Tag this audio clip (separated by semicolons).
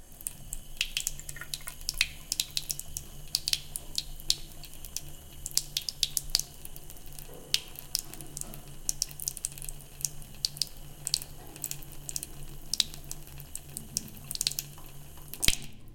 azienka; sink; toilet; umywalka; water; zlew